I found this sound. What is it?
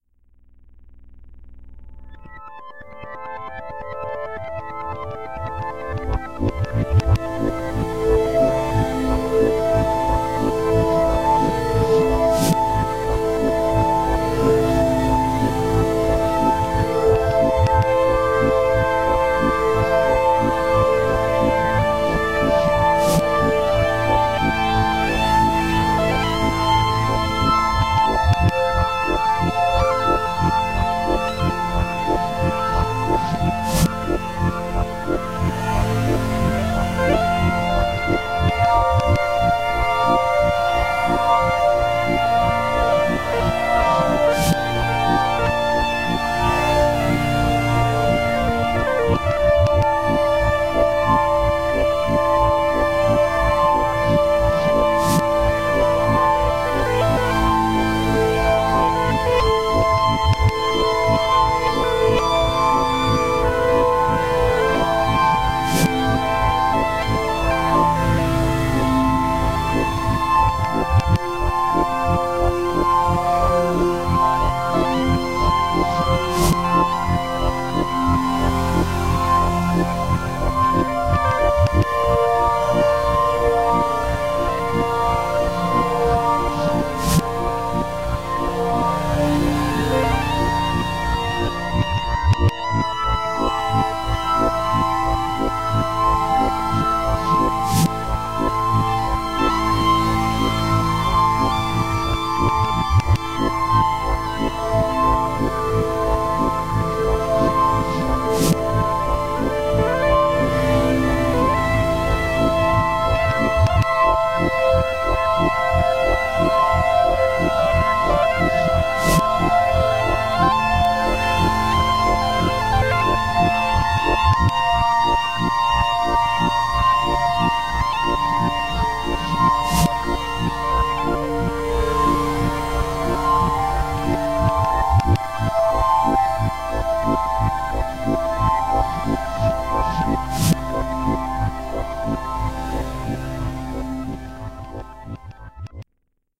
Short music track made with a Teenage Engineering OP-1 on December 2017.
Quick improvisation that was bounced to audio by playing the recorded material backwards.